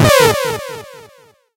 Magic Spell 07
A spell has been cast!
This sound can for example be used in role-playing games, for example when the player plays as Necromancer and casts a spell upon an enemy - you name it!
If you enjoyed the sound, please STAR, COMMENT, SPREAD THE WORD!🗣 It really helps!
angel, bright, dark, fantasy, game, mage, magic, necromancer, rpg, shaman, sorcerer, spell, wizard